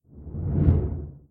This is a soundeffect that I created! Uh oh, Stinky! I used audacity. I created this by dropping an item on the ground and editing the original clip to this.
You can use this in a game if you want.